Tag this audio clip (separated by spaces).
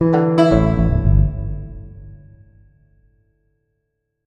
alert banjo echo error notification reverb ui